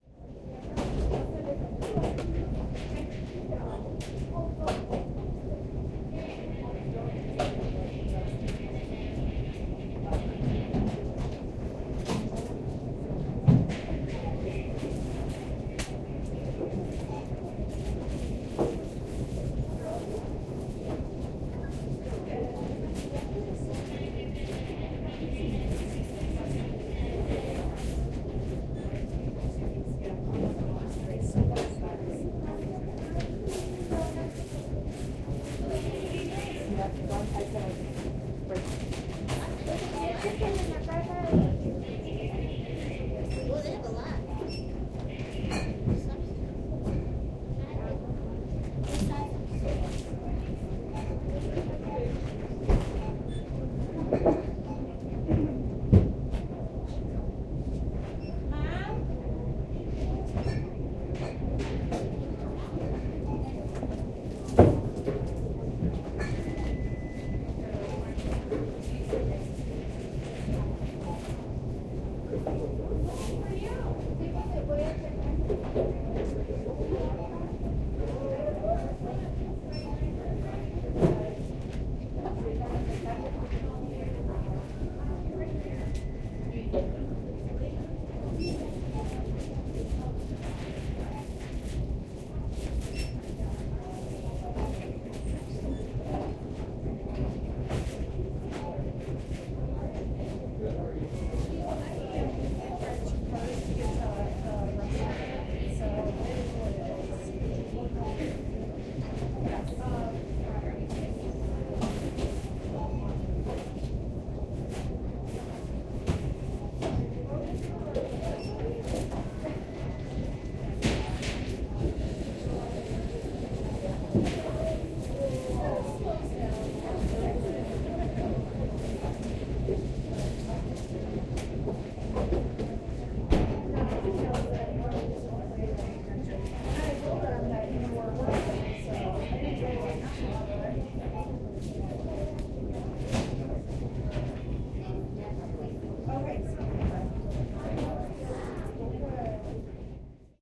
Target superstore on a Wednesday evening
Recording of a Target supermarket at around 6pm on a weekday evening
field-recording, shopping, shop, superstore, Target, supermarket, ambience